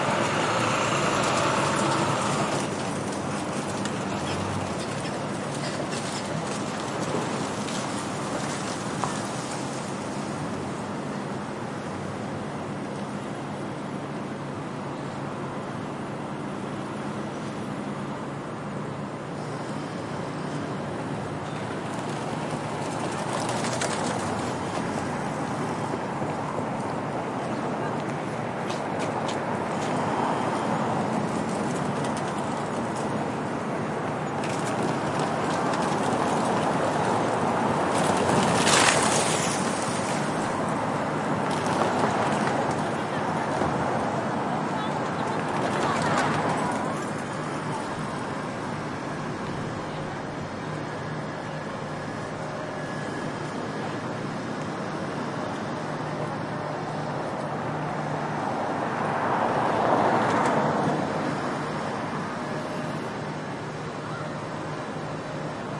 Field recording of Times Square in New York City recorded at 6 AM on a Saturday morning. The recorder is situated on the corner of 7th Ave and West 45th St, some cars (mostly taxis) are underway, some (very few) people as well, cleaners and a team of subway construction workers are on the scene.
Recording was conducted in March 2012 with a Zoom H2, mics set to 90° dispersion.